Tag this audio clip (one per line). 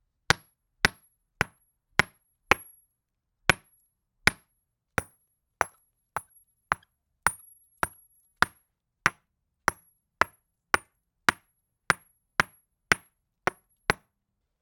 rock-break
rock-hammer